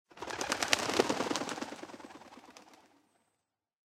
Birds flap
Birds, flight